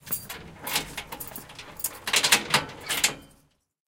Unlocking Security Door
Just opening a heavy metal security door. There might be some sounds in there of use to someone.
jail, security, stereo, foley, metalic, keys, gaol, dungeon, unlock, open, door